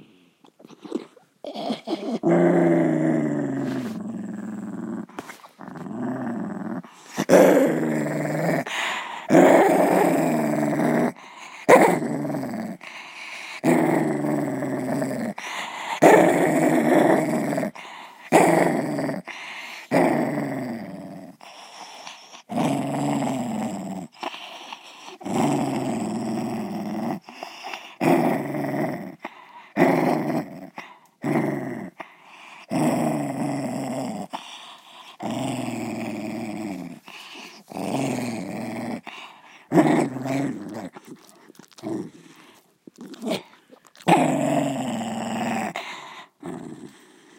Little doggie growls angrily.